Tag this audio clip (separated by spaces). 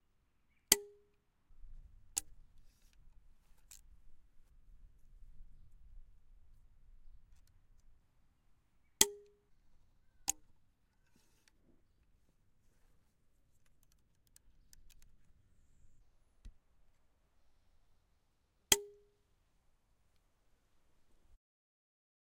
bolt arrows